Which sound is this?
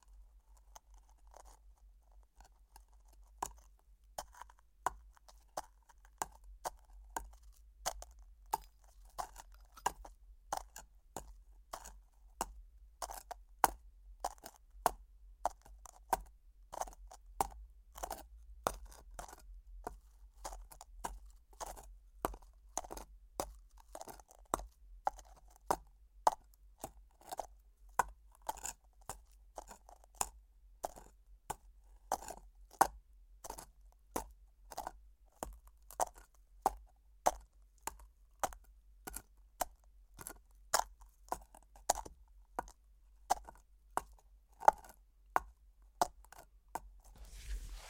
fly 4 horse steps 03
hore walking foley
coconuts, horse, foley, steps